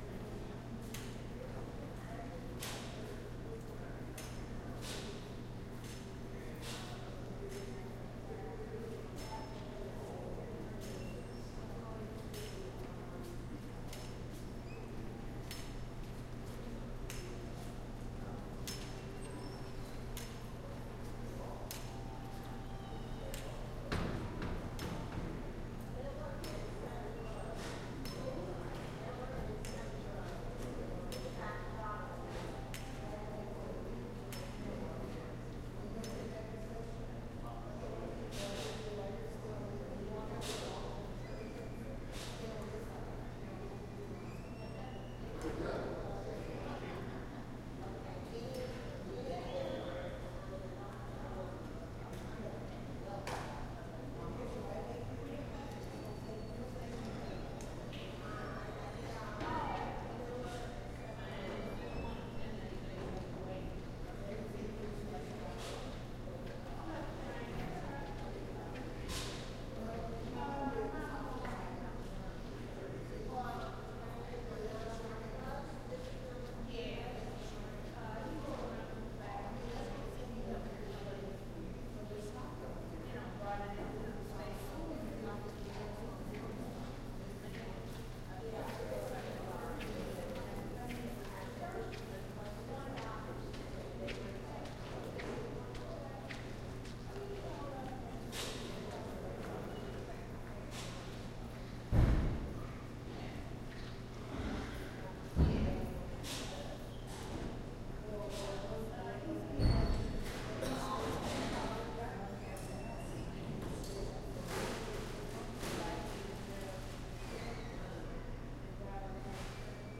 Part of the Dallas Toulon Soundscape Exchange Project. A recording of the waiting area at Union Station in Dallas, TX on a Saturday (noon). Recorded with a Zoom H1 Handy Recorder on tripod facing west. Density: 2 Polyphony: 3 Busyness: 1 Order to Chaos: 2